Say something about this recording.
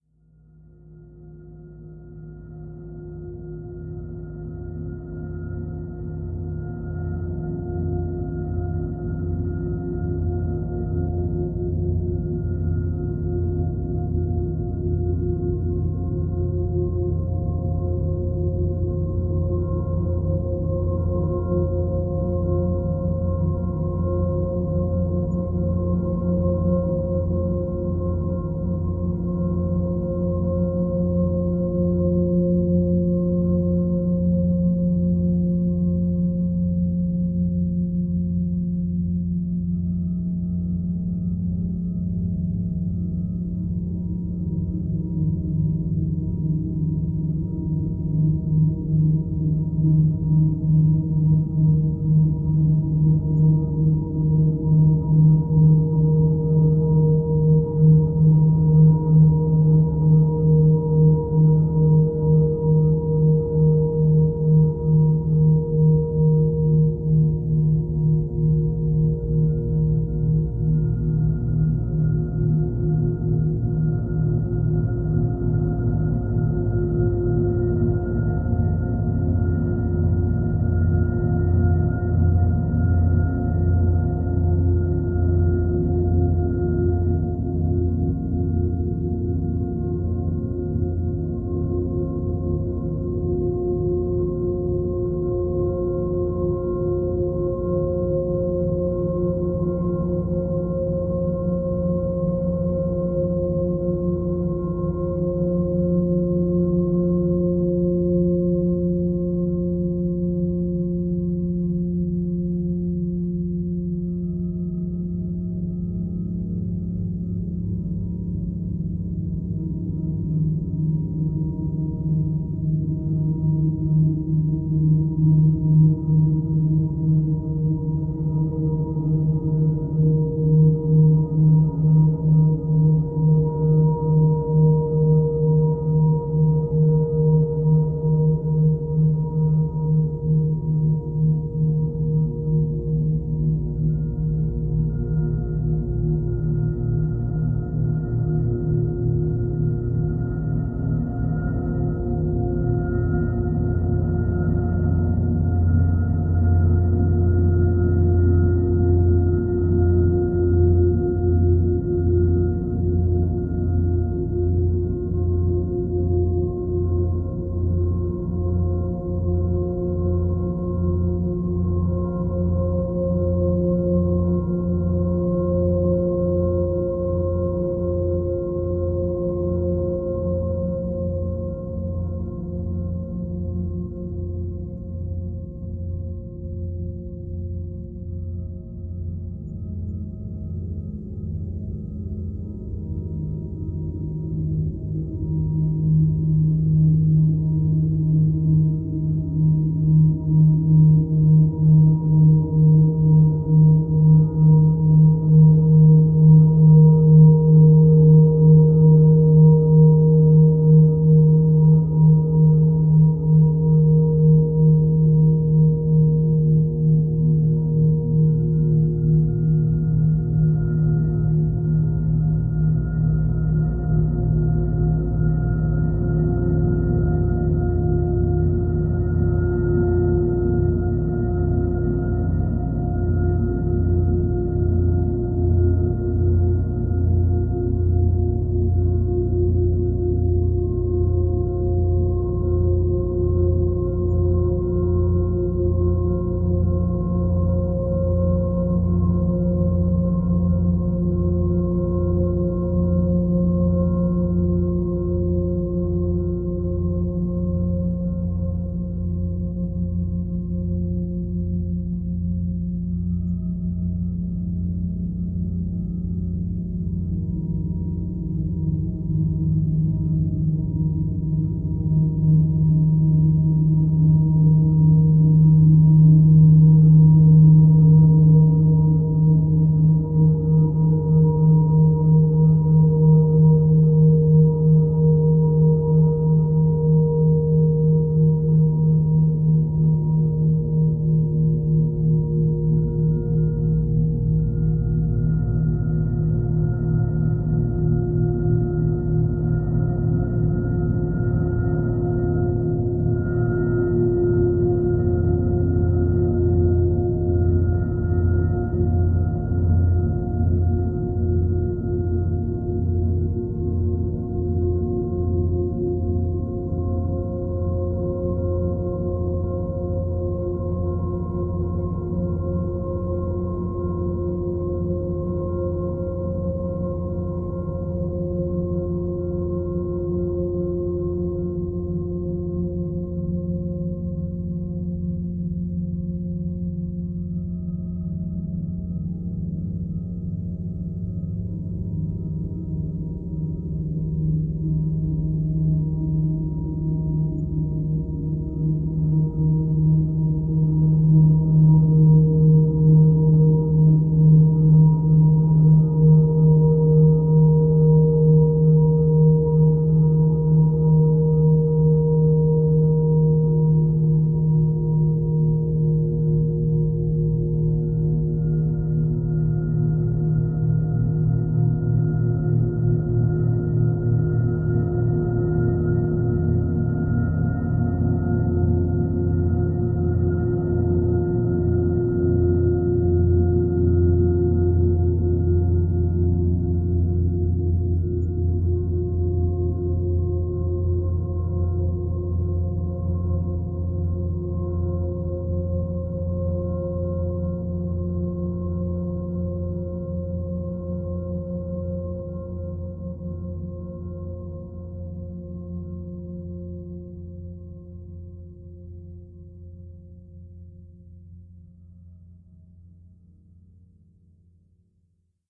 Sound squeezed, stretched and granulated into abstract shapes
noise, ambient